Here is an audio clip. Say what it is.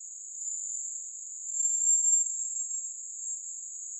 a pure tone around 7000 Hz extracted from a truck applying break. Represents my personal threshold for high pitched sounds (and allowed me to discover I have some deficiency on my right ear)/un tono puro de alunos 7000 Hz sacado de la frenada de un camión. Representa mi personal umbral de percepción de sonidos agudos (y me lleva a aceptar que tengo menos sensibilidad en el oido derecho)